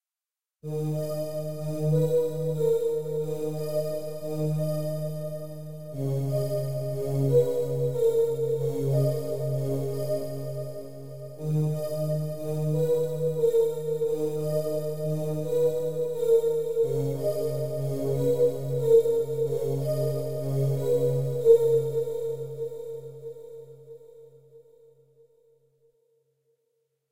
cine pad3
thrill, background, drone, deep, dramatic, atmosphere, cinematic, background-sound, thiller, scary, space, drama, hollywood, film, pad, horror, ambience, dark, trailer, movie, soundscape, spooky, mood, music, sci-fi, ambient, suspense